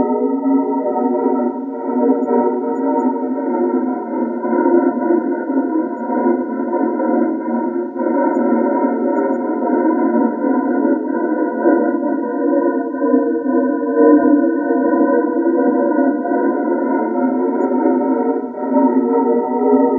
Synthetic ambiance reminiscent of planetary weirdness sounds from Star Trek, though it is not intended to emulate those. Unlike the others in this series, this one is volume-modulated for a different feel, just for variety. I can imagine this being used as just one component (drone) of any other-worldly situation. Just add the sonic sprinkles of your choice. All components of this sample were created mathematically in Cool Edit Pro.